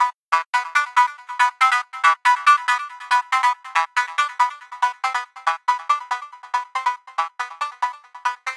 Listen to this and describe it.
TR LOOP 0408
loop psy psy-trance psytrance trance goatrance goa-trance goa